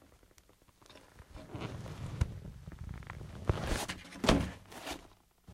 Slide on cloth
cloth, swish, object, metal, hiss, slide, fabric